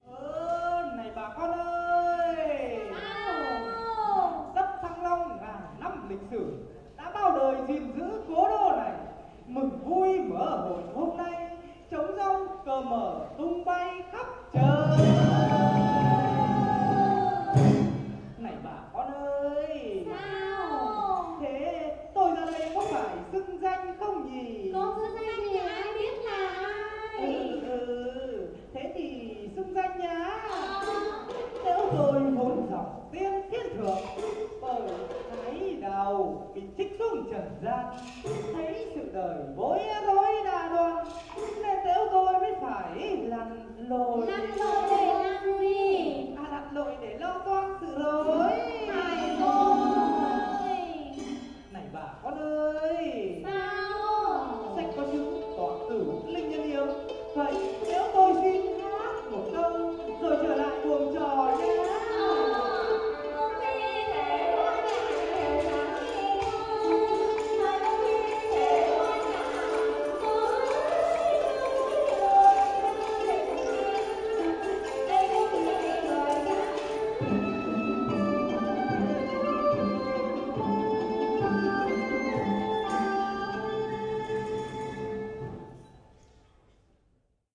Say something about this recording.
BR 046-1 VN HanoiTheater
Traditional Vietnamese theatre and music, recorded near Hoan Kiem Lake, in Hanoi.
Recorded in October 2008, with a Boss Micro BR.